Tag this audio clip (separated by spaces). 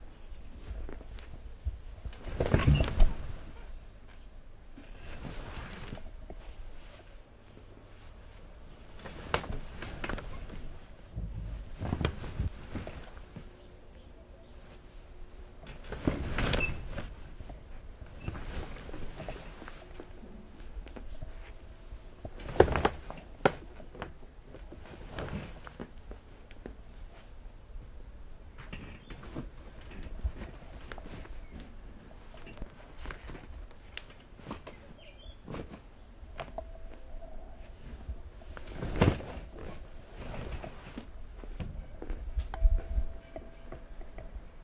OWI bed-creaking bed-creaking-sounds bed-noises bed-sounds creaking-sounds metal-creaking metal-noises rusty-metal-sounds